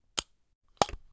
Tin Toys: ladybug noises
This is the recording of little ladybug wind-up tin toy.
Two interesting noises taken from my recordings af a little ladybug tin toy.
Interesting metallic sounds.
metallic, tin, toy